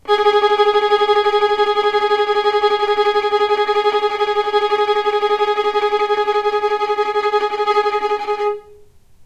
violin tremolo G#3
tremolo, violin